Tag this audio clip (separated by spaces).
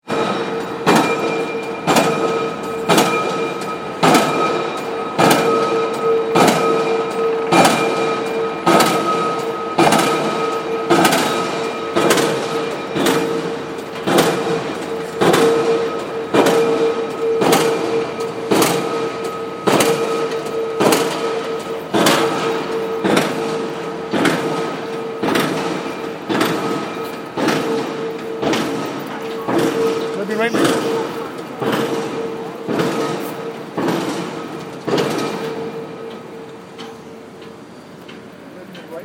percussive pile-driver construction industrial outdoors